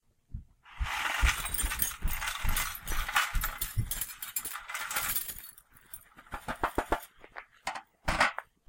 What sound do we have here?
Dumping glass into trash from dustpan
After I smashed up all those lightbulbs, I decided to record the cleanup stage, so here it is.